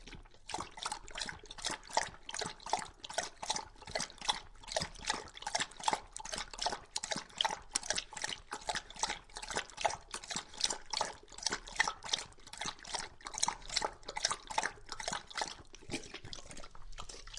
This is a recording of an english labrador drinking water.